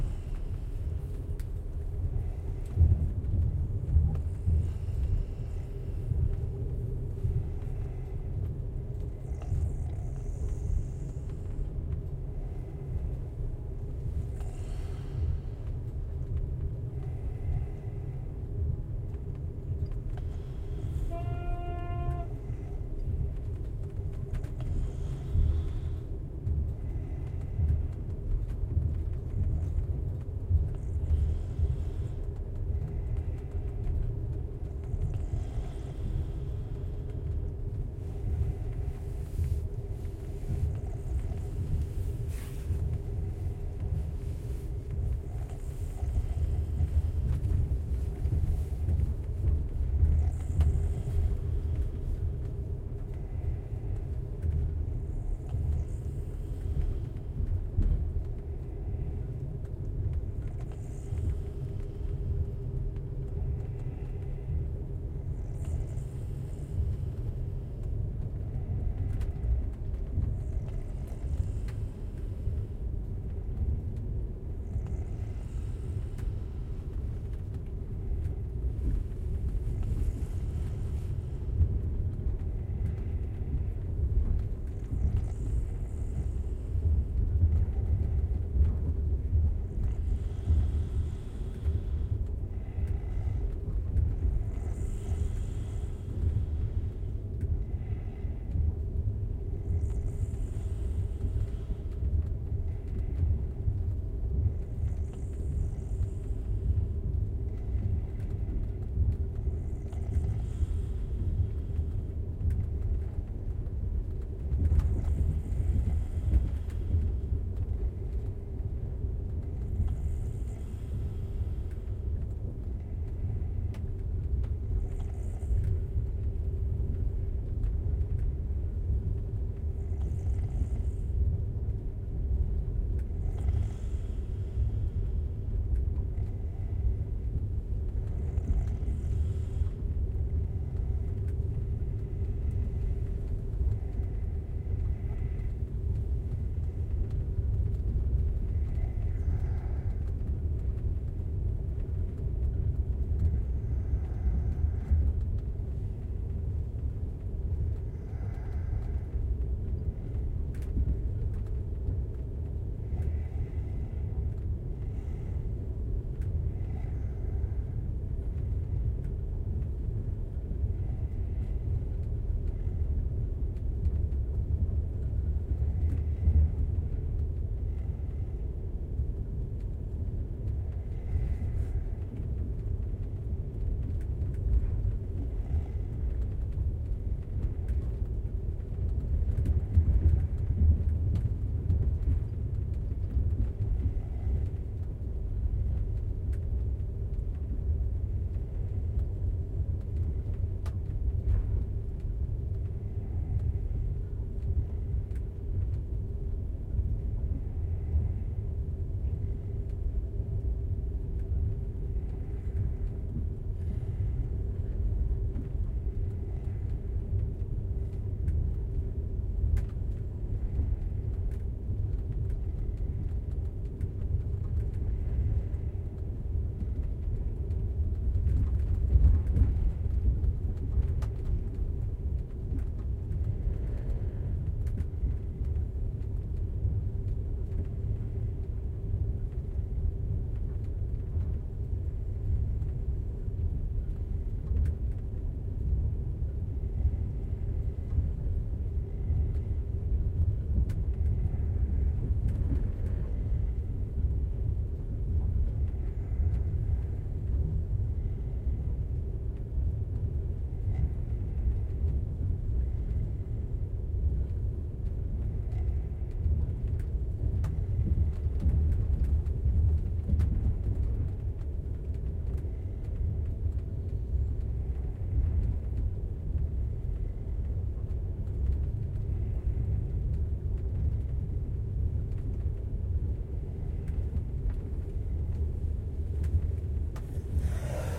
train night in a sleeping car , snoring
Trans siberian train, third class wagon sleeping car , a man is snoring
Schoeps Ortf mixed with a boom